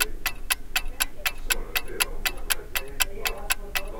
Tabletop clock ticking, original speed
Prim clock, made in Czechoslovakia in the '70s or '80s maybe.
tick, clock, clockwork, ticking, tick-tock